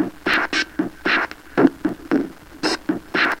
A far less excited remix of the rabbitbreaks uploaded by Roil Noise.